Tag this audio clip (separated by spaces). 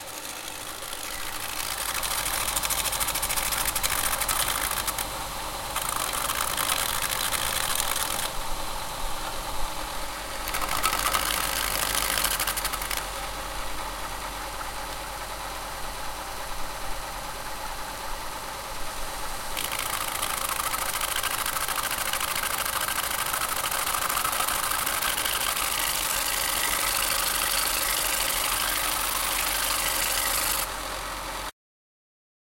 electric sander plastic power tool